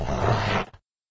A dog growl from a labrador retriever.
Retriever, Animal, Big, Labrador, Growl, Labrador-Retriever, Dog